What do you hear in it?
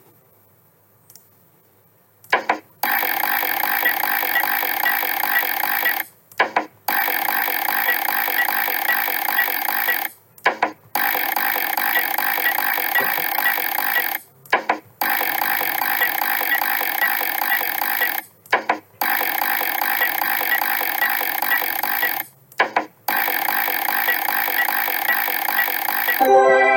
slot machine reels sound
Questo è il suono che fa impazzire ogni amante dei casinò. Il suono di una slot machine.
casino, gambling, slot